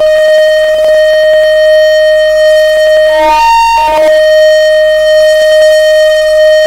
Day before mas 2013 this beacon signal was heard. Beacon signals are used like lighthouses on earth, i.e. for giving information about geographic orientation. Are alien spacecrafts doing visits?
Futuristic, Alien, Broadcasting, space, Sound-Effects, alien-sound-effects, fx